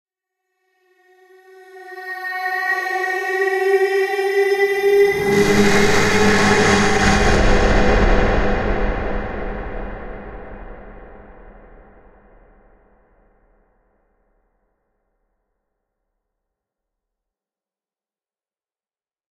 Scary Jumpscare Buildup 01
voices, Creepy, Sound-Design, Scary, Atmosphere